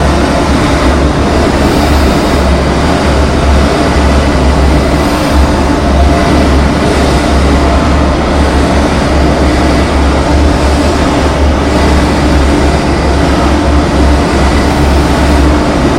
INDUSTRIAL WASTELAND
TERROR, INDUSTRIAL